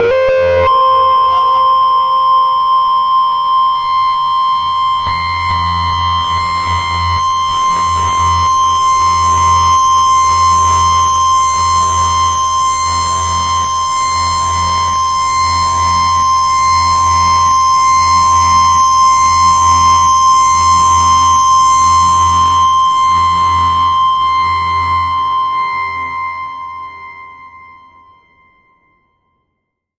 THE REAL VIRUS 01 - HARD FILTER SWEEP LEAD DISTOLANIA - C6
THE REAL VIRUS 01 - HARD FILTER SWEEP LEAD DISTOLANIA is a multisample created with my Access Virus TI, a fabulously sounding synth! Is is a hard distorted sound with a filter sweep. An excellent lead sound. Quite harsh, not for sensitive people. Enjoy!
multi-sample, solo, distorted, lead, harsh, hard